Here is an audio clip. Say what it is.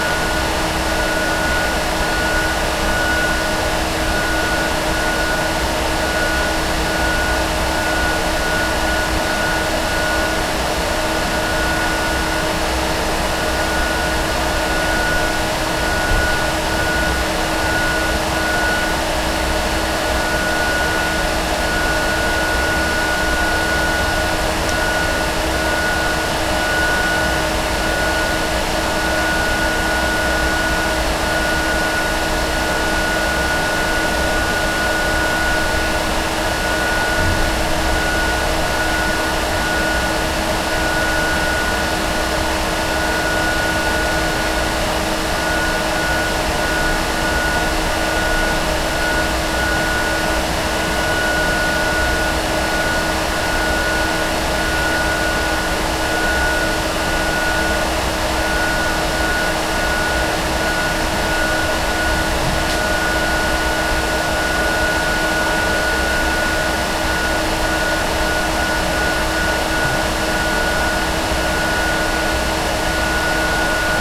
Computer server room
Fan noise from an Avid ISIS raid array
Computer, server-room, server, ambience, noise, raid-array, avid, isis